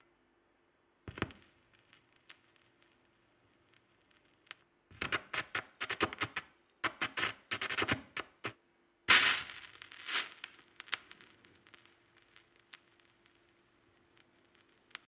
Vinyl static
static and pooping from a vinyl before any music begins and if the needle isn't correctly placed onto the vinyl.